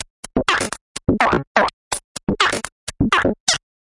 ClickNBuzz 125bpm05 LoopCache AbstractPercussion
Abstract Percussion Loop made from field recorded found sounds
Abstract, Loop, Percussion